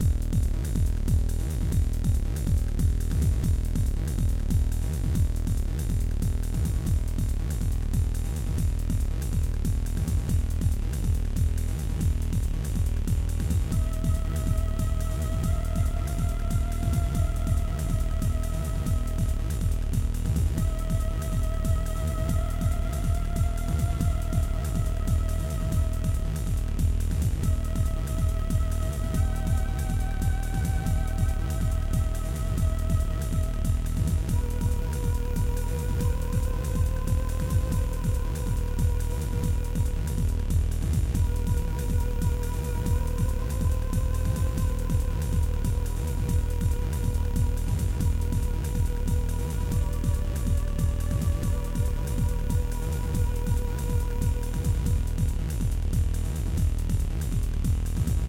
Fighting music I made a while ago for a game. Created with a synthesizer, Recorded with MagiX studio. edited with MagiX studio and audacity.